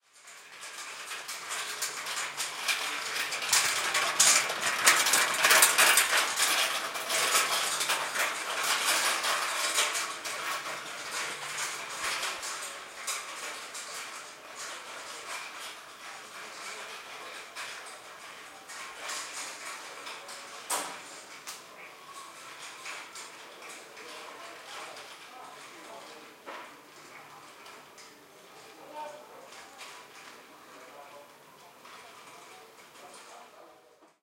Stereo recording of a sound of metal trolley passing-by. Recorded on an iPod Touch 2nd generation using Retro Recorder with Alesis ProTrack.